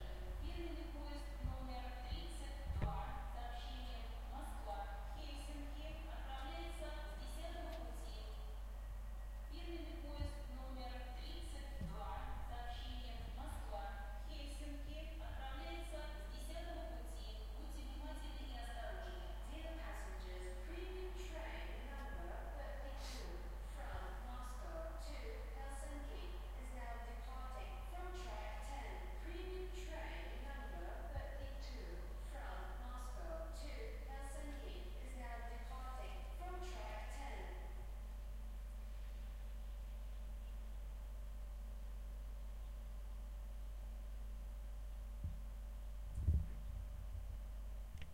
St.Petersburg railway station announcements (to Helsinki) in night-time.
From cabin. Clear announcement saying that the train will leave to Helsinki. Recorded with Tascam DR-40.
announcement, english, field-recording, platform, railway, train, trans-siberian